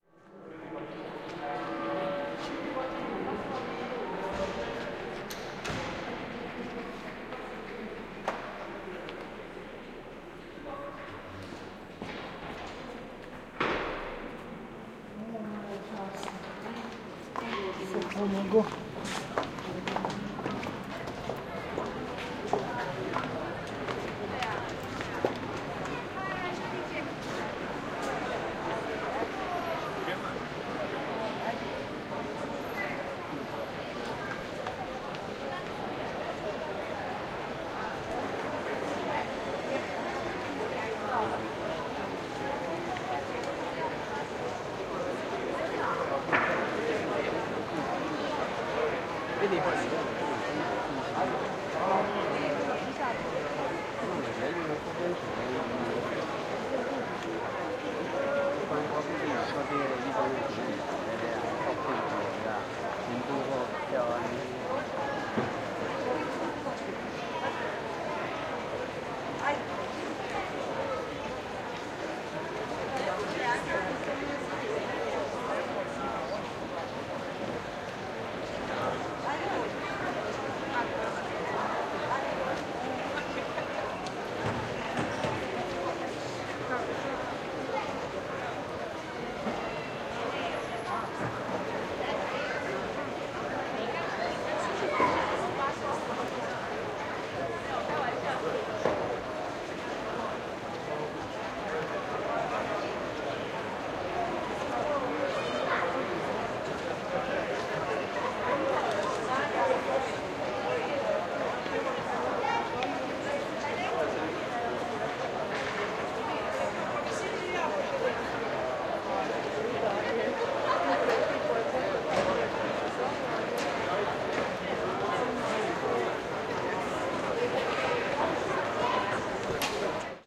in front of crkva sv. Vlaha dubrovnik 070516
07.05.2016: 16.30. Recorded in front of crkva sv. Vlaha in Dubrovnik (Old Grad). Ambience of the square after the church marriage. No processing (recorder marantz pmd620 mkii + shure vp88).